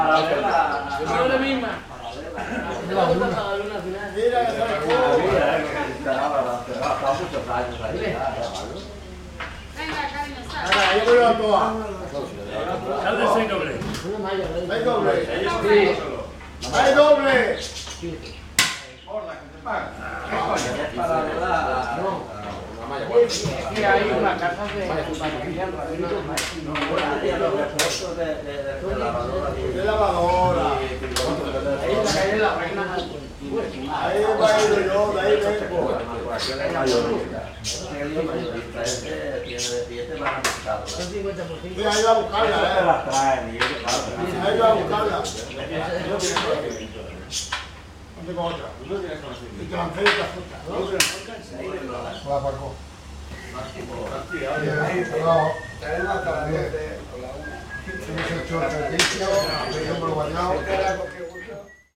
Interior Bar 2
Ambience inside a Spanish Bar